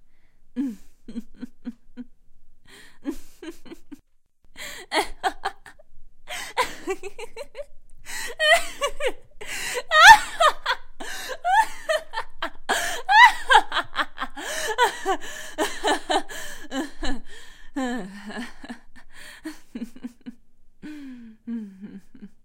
Woman Insane Laughter
Me laughing insanely.
insane, laughing, maniacal, laughter, giggle, woman, giggling, girl, crazy